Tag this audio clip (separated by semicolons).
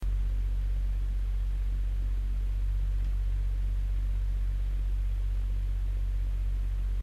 room-noise; air-conditioning